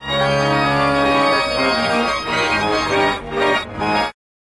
Reversed and Stretched Organ 01
Atmosphere, Reversed, Stretched